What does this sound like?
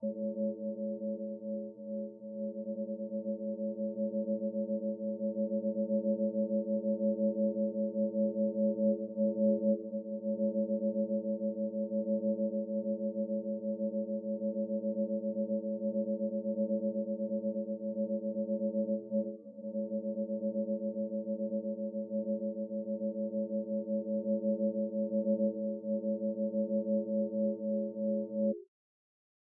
Digital Manipulated Violin (C4/~260Hz)
This is a recording of a violin playing C4, with digital FX added onto it.
digital, electronic, violin